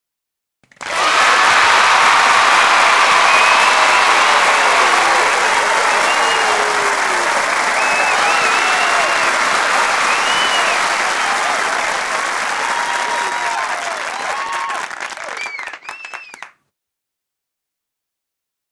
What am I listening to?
This is an applause sound effect